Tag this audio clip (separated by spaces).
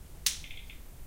battery click fry plug spark speaker voltage